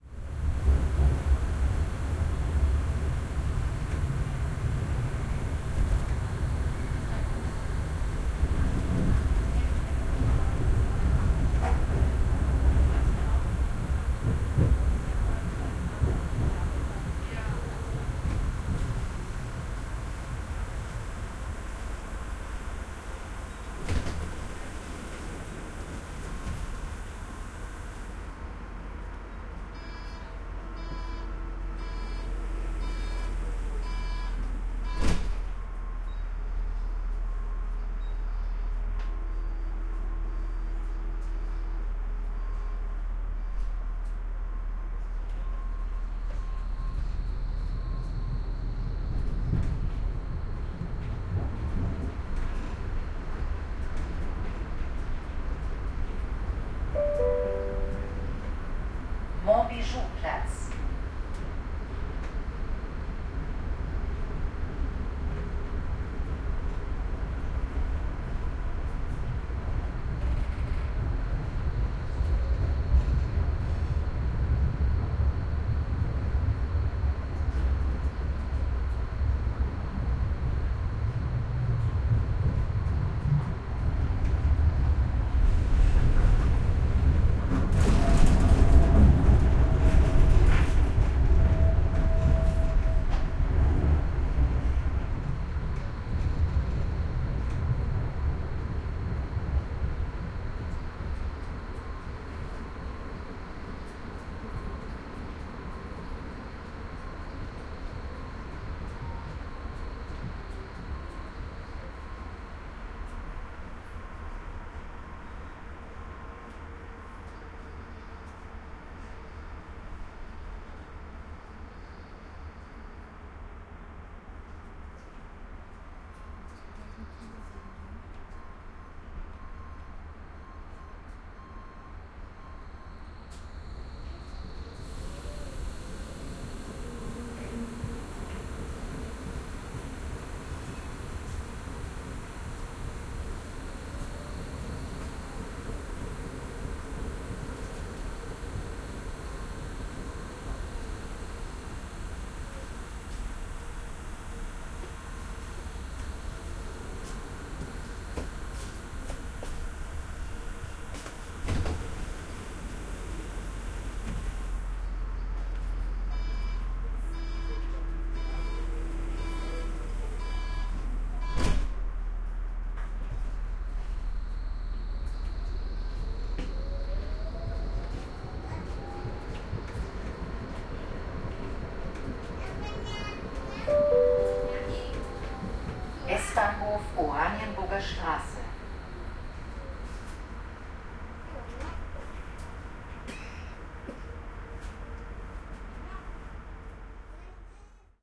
Tram Berlin
Interior of an almost empty tram in Berlin with stops, door noises
Sony PCM D100